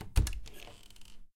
Door Close 01
Office door. Recorded with Zoom H4n.
close, Door, field-recording, handle, open